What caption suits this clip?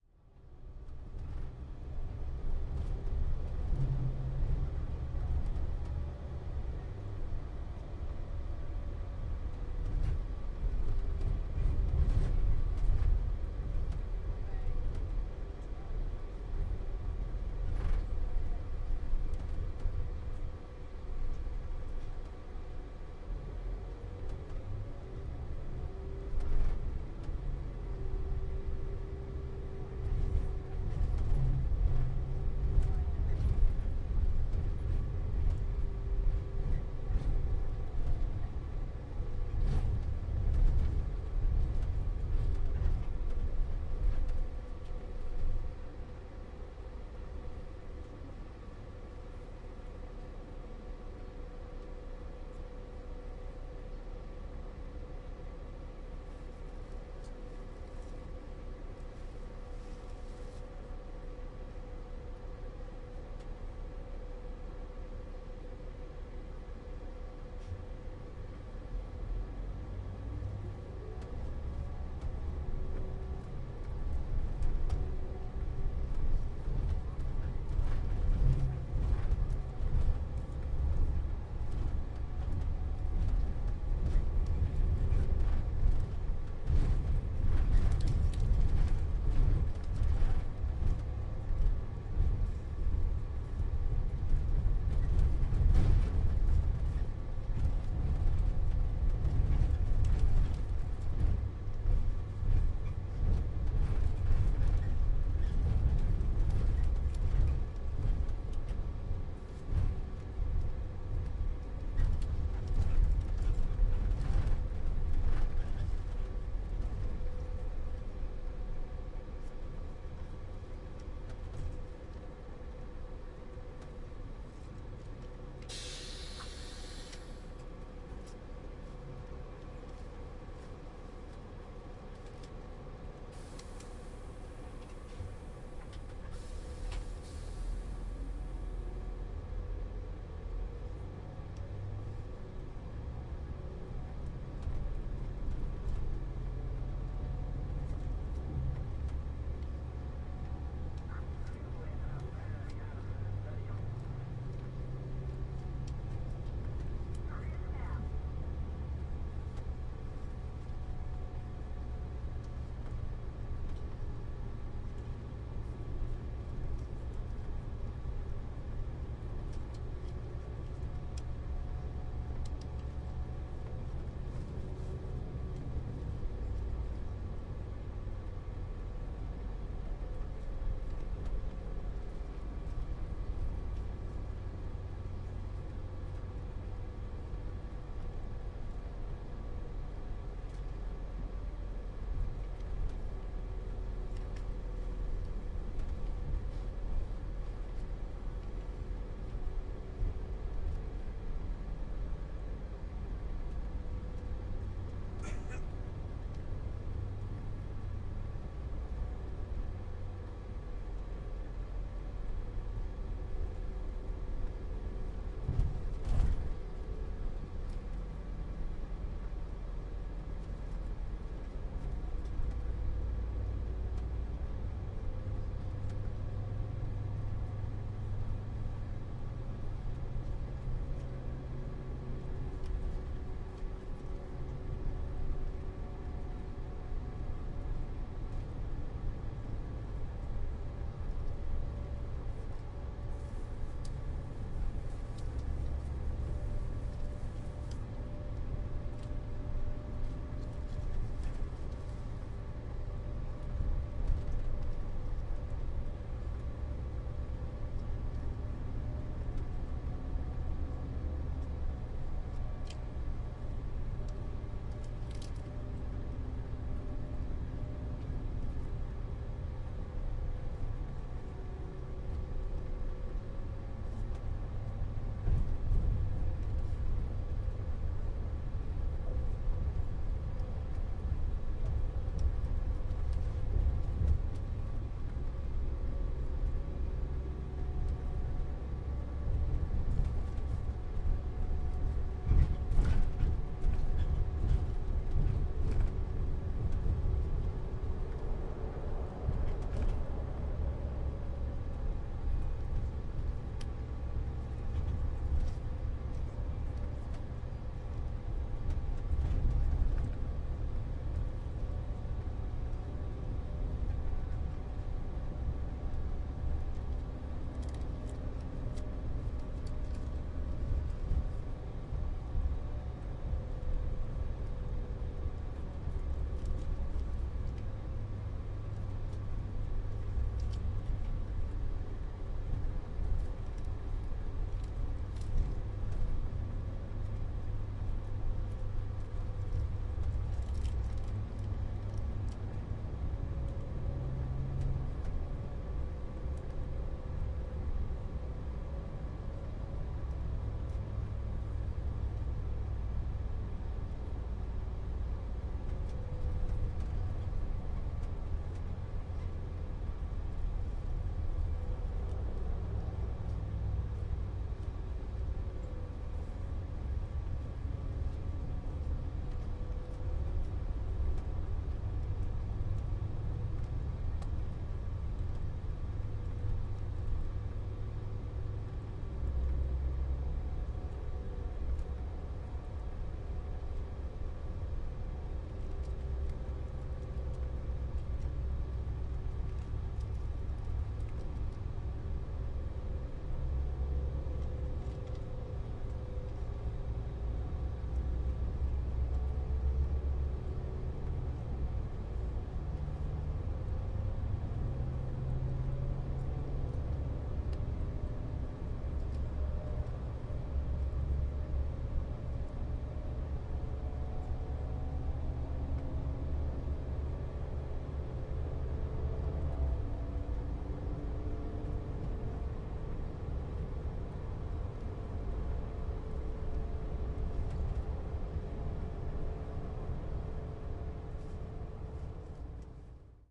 front ST coach bus light passenger presence
front pair of H2 quad recording coach bus interior sops and starts, bumps